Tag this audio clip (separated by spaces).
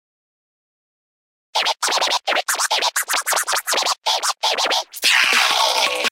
dj hip-hop old-school scratch scratching turntables